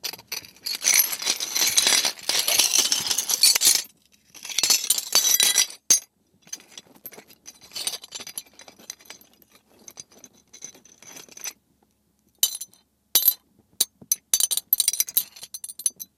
Glass remnants 02
Sifting through shattered glass. Field recorded and filtered through Sound Forge to remove unwanted noise.
break-glass, broken-glass, glass, Glass-break, glass-broken, glass-shatter, shatter, shatter-glass